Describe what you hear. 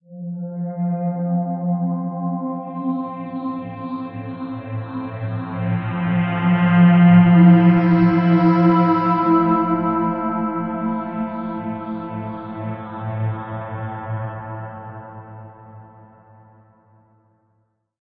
Ambient soundscape created with MetaSynth.
space, evolving, artificial, pad, dreamy, soundscape, ambient